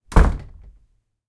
Door, Wooden, Close, A (H6 MS)
Raw audio of a wooden door being closed with a little force. Recorded simultaneously with the Zoom H1, Zoom H4n Pro and Zoom H6 (Mid-Side Capsule) to compare the quality.
An example of how you might credit is by putting this in the description/credits:
The sound was recorded using a "H6 (Mid-Side Capsule) Zoom recorder" on 17th November 2017.
close, doors, wood, door, closing, H6, slam, wooden, shut